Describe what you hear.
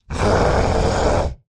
A large monster voice
beast, beasts, creature, creatures, creepy, growl, growls, horror, monster, noises, processed, scary